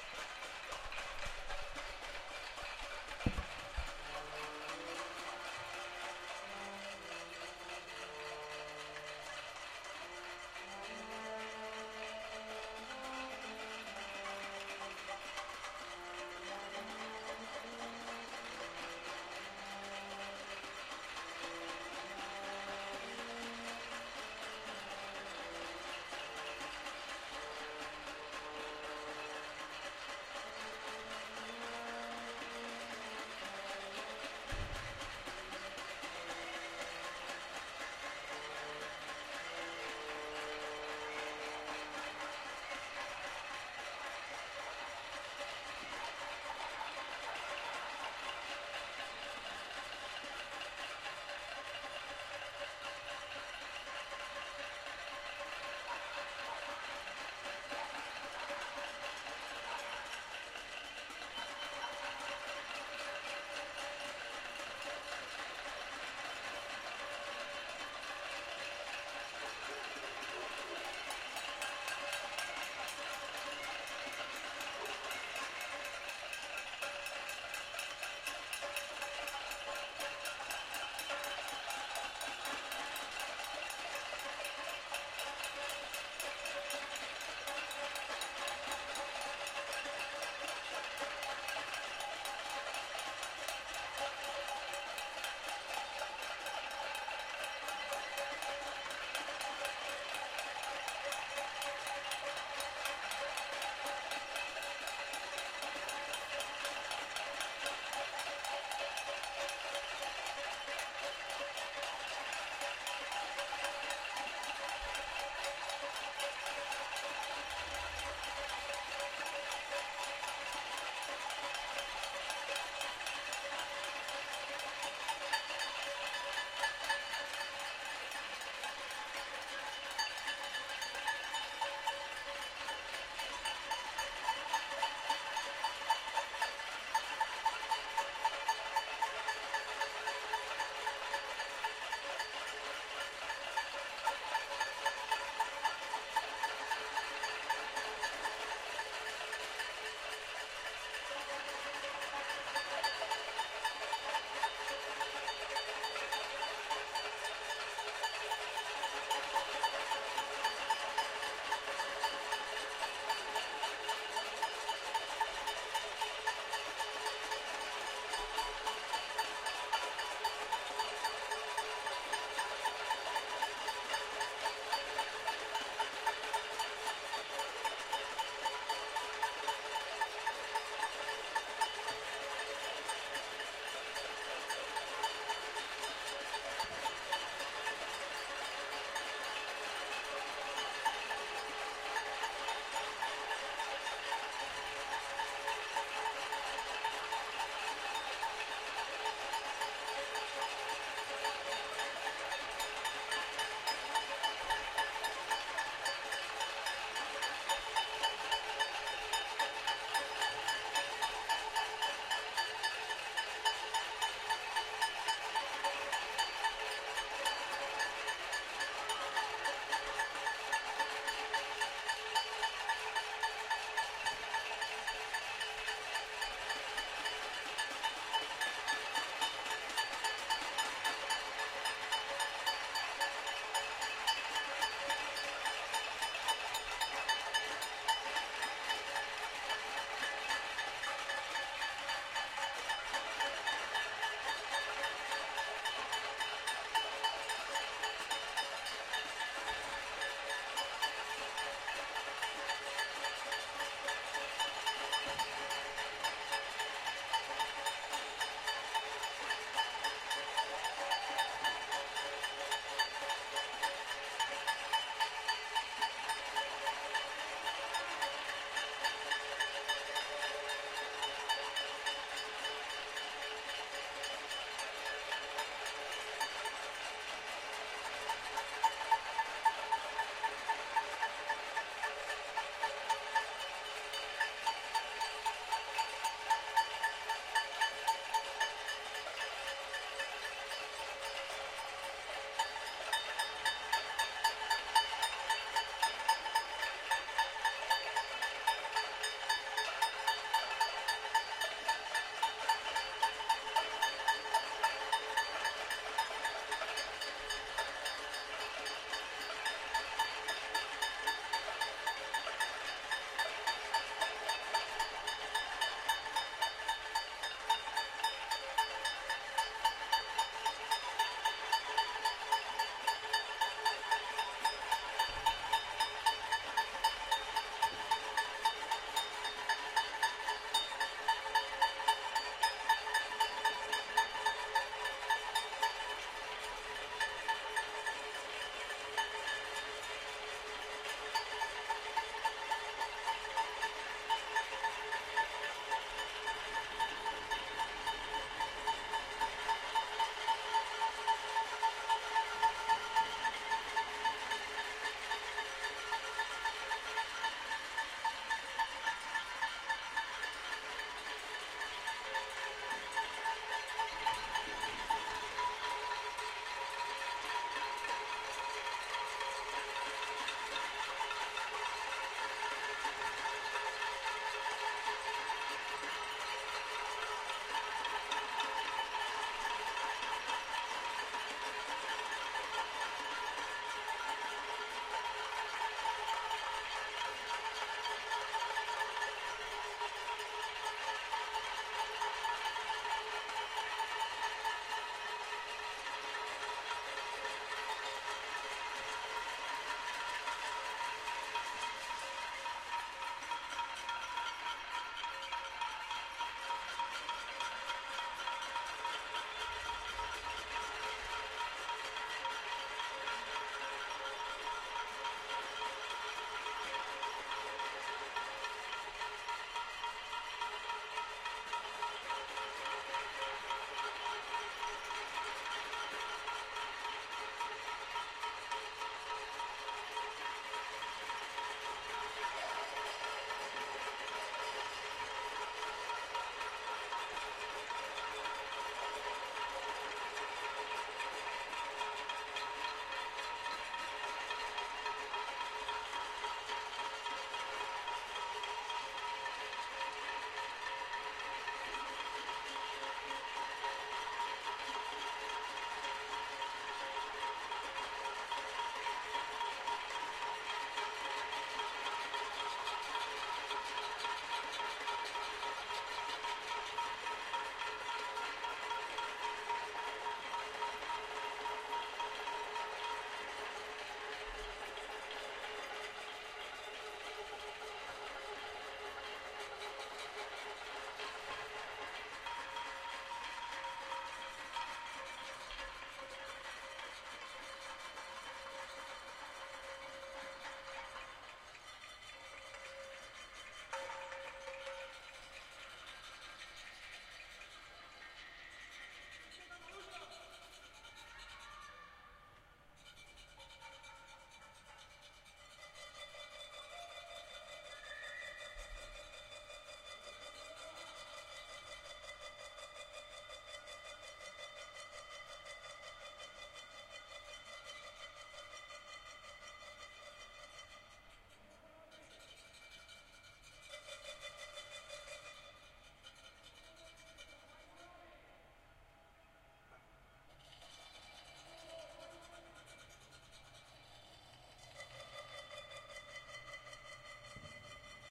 protests casolets trombone
protest for the independence of catalunya
percussion, noise, protests